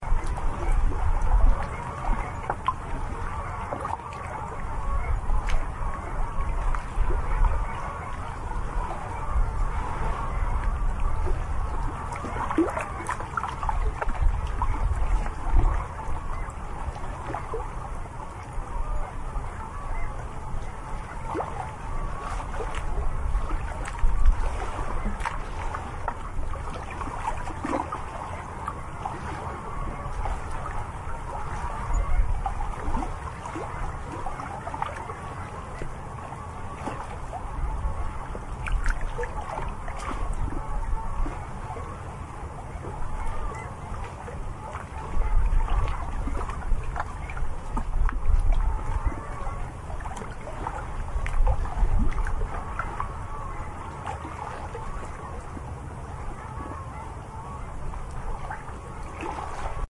January 2006-recording of sea and distant long-tailed ducks at the Broch of Gurness, Orkney. Sony MD MZ-NH700 and ECM-MS907.
birdsong, ducks, orkney, water, field-recording, ambient